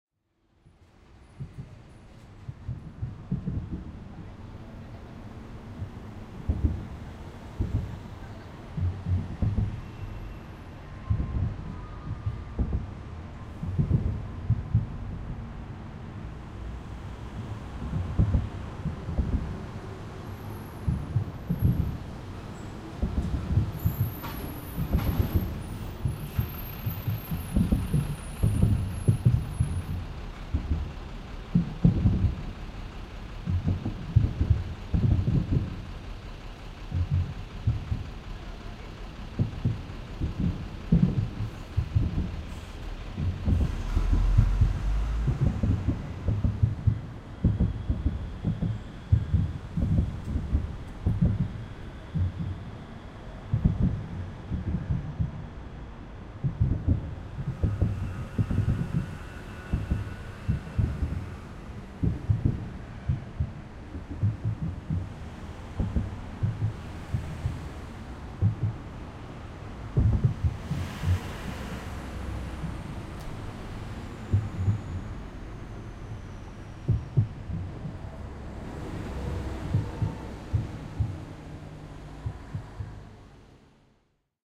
Recording of a place in Barcelona where the cars, passing over a bridge, make a particular heart-like sound. Made with the Zoom H4.
traffic, bridge, city, field-recording